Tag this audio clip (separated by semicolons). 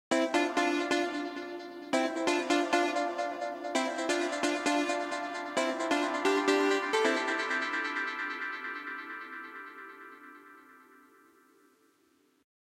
keys; synth; loop; trance; melody